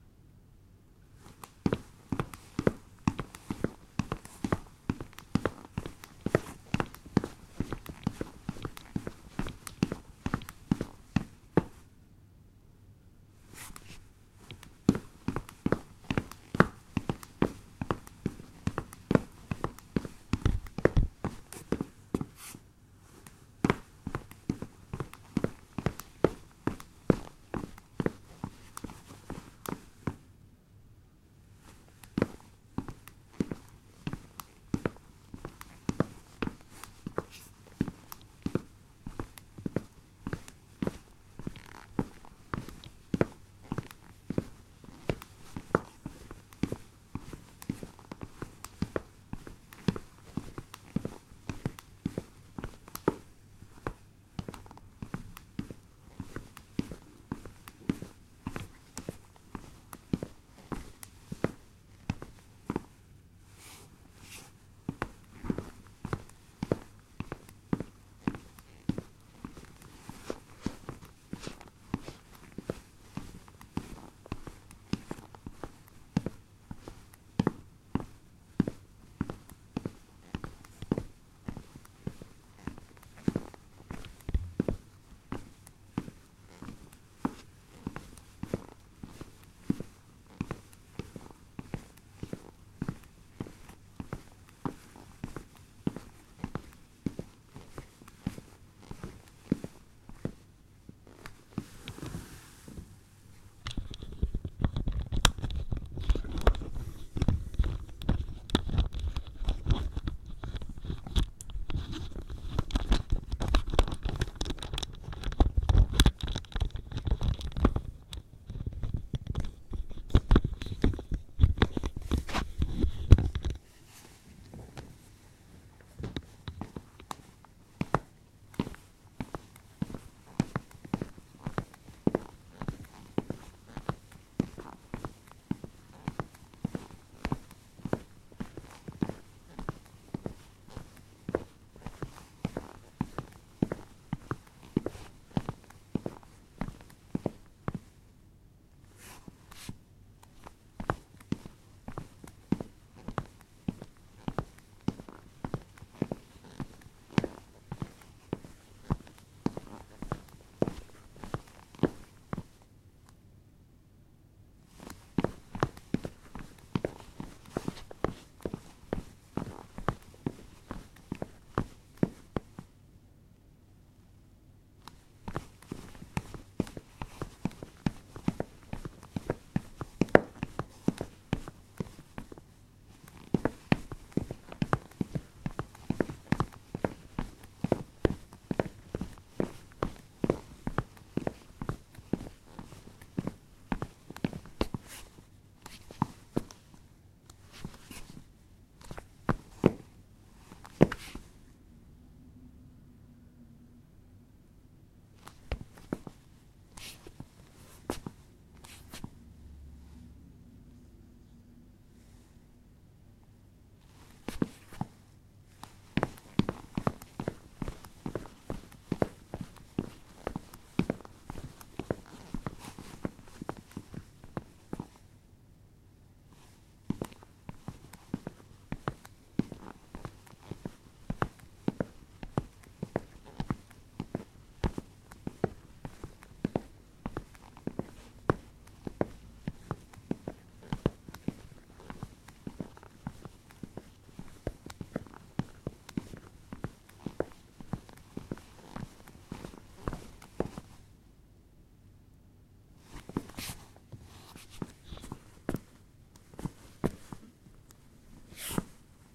sfx turnschuhe auf parkett 01
Walking on wooden floor with sport shoes
running, steps, walking